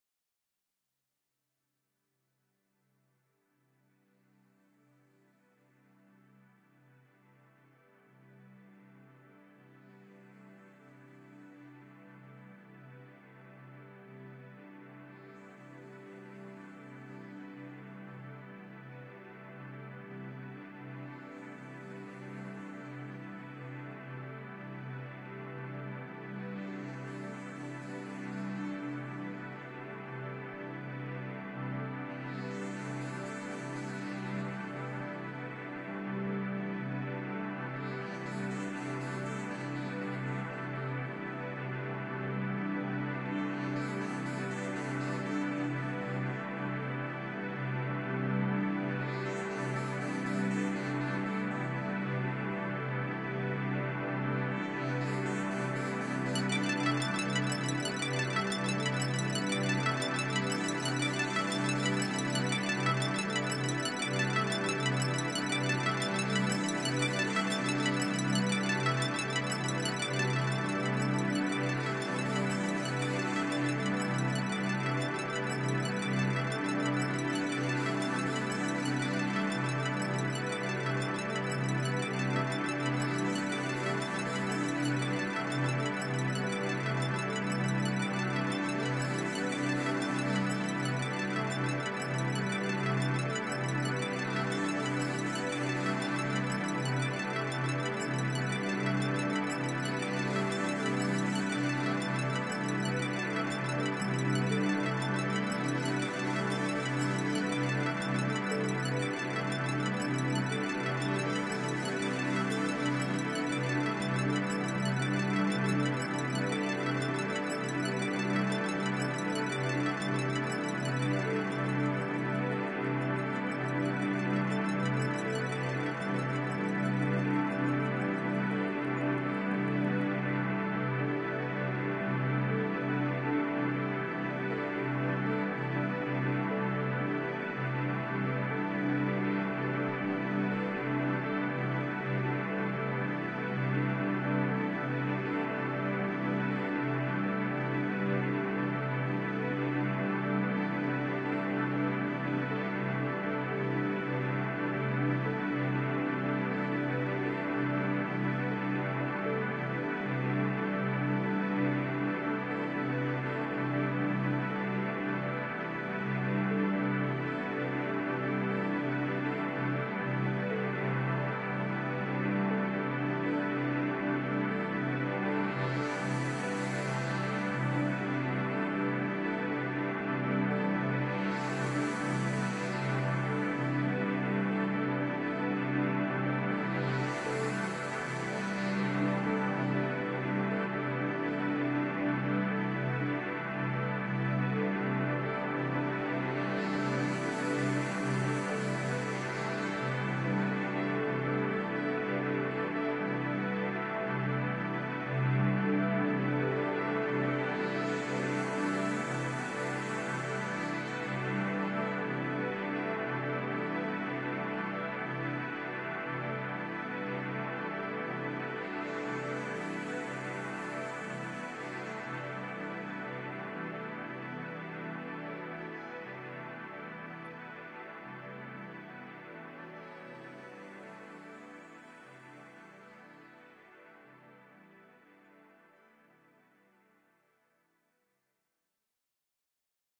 K4 JV2080 ambient
An ambient texture played on the Roland JV2080 and the Kawai K4.